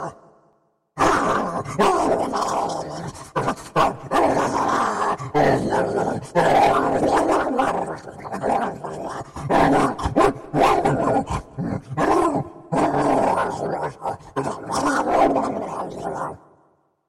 AaA Zombie 2
If you use this audio I'd love to see the finished product.
Zombies, growling